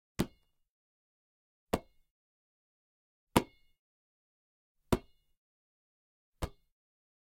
Throwing the basketball from one hand to the other, slowly.